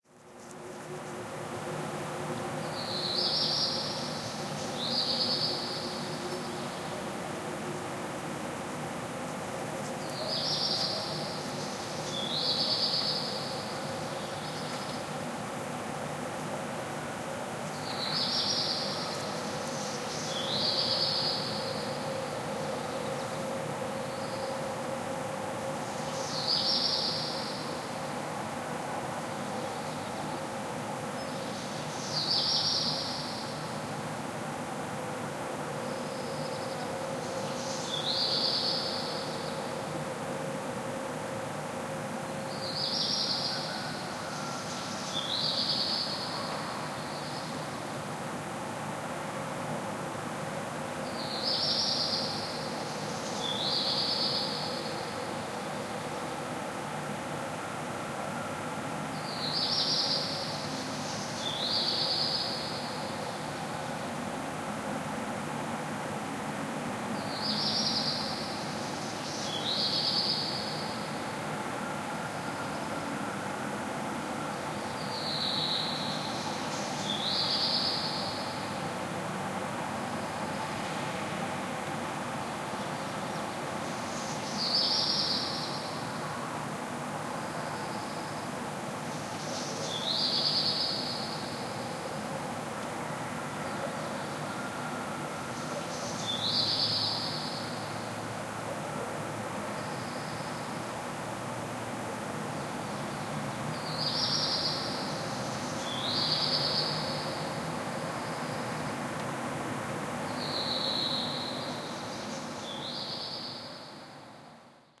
Birds and ambiance
Forest near a town. The bird sounds from the forst combine with the ambiance of the town.